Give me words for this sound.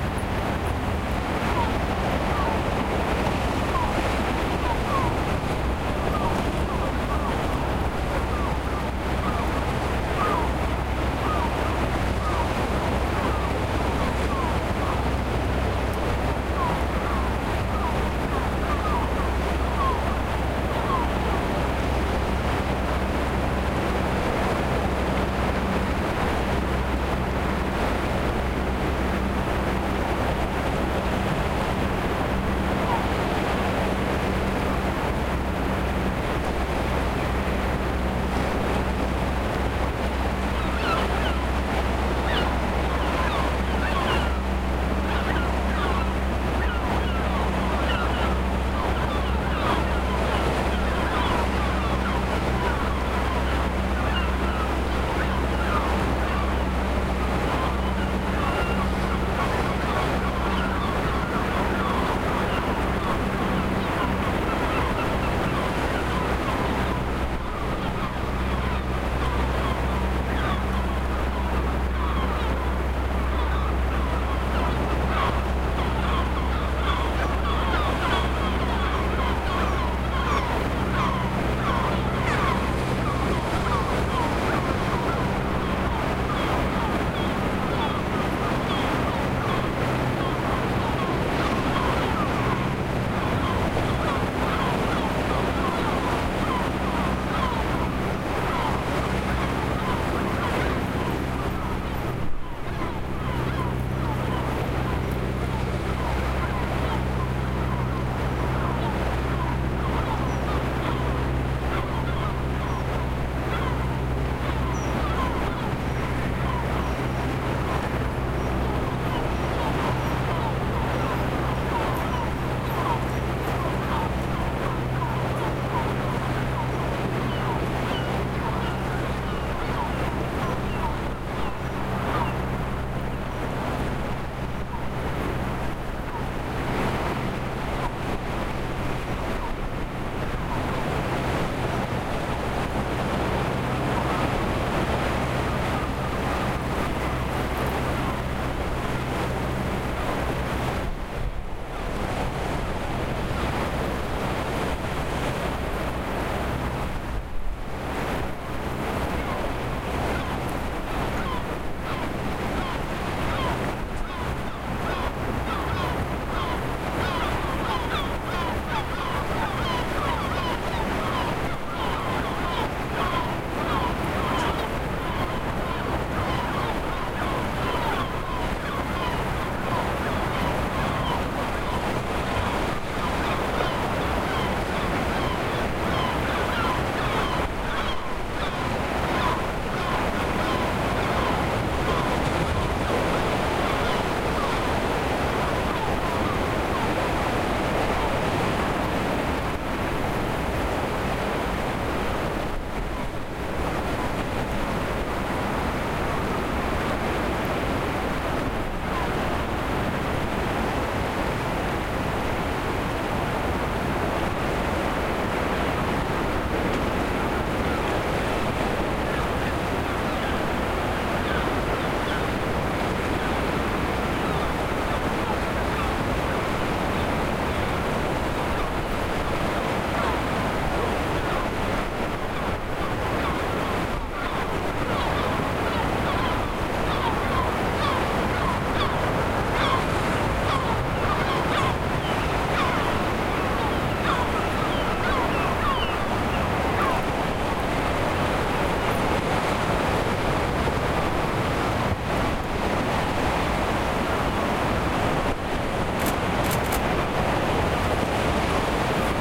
Windy day at the Getaria beach with seagulls flying, Euskadi
Día ventoso en la playa de Getaria con algunas gaviotas volando cerca, Euskadi
Zoom H4n